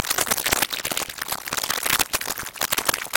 skipping glitch tape 5
Reminds me of either a glitching digital recording or a damaged tape
analog, corrupted, damaged, data, distorted, distortion, lo, lo-fi, loss, low, magnetic, noise, quality, scratchy, tape